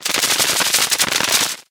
Mechanical, transformation, fast, Clicks, mechanism, press, gear # 4
Mechanical, transformation, Machinery, Machine